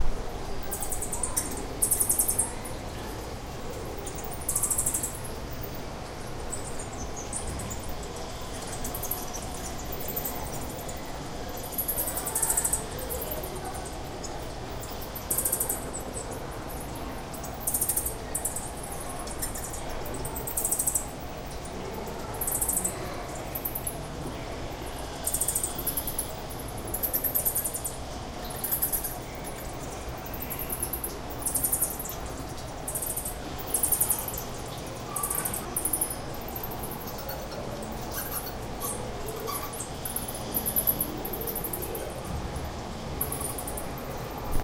Leaf-nosed Bats chirping in a nocturnal exhibit. Recorded with a Zoom H2.